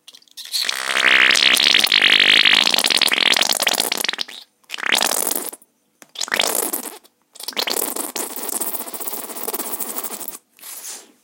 Using a fairy bottle I recorded this sound